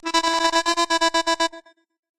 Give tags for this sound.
Arcade; Abstract; Alarm

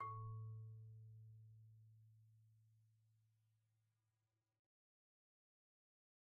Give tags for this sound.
hit; idiophone; instrument; mallet; marimba; one-shot; orchestra; organic; percs; percussion; pitched-percussion; sample; wood

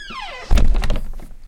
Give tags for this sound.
crackle; doors

